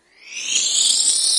Rewind Creeps Varispeed 02b [NB]
retro, rewind, varispeed